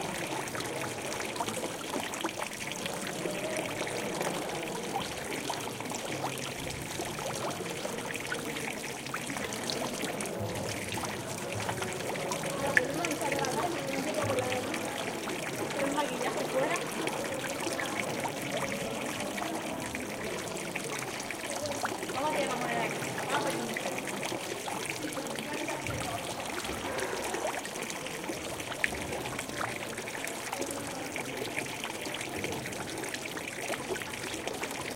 In the inner patio of the church we found a fountain and recorded it.
You can hear lots of tourists in the background. The three files have
been recorded from different angles.
ambience, barcelona, church, dripping, field-recording, fountain, humans, people, water